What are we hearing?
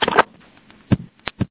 hang-up6
the most beautiful I've ever recorded, hang up with two drops
click, hang-up, phone, telephone